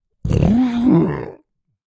These are all me making terrible grunting growling snorting non-words for an offstage sea creature in a play but it could be anything monster like. Pitched down 4 semitones and compressed. One Creature is a tad crunchy/ overdriven. They sound particularly great through the WAVES doubler plugin..
creature, slurping, grunting, vocal, human, voice, beast, snorting, growling, monster, voiceover, non-verbal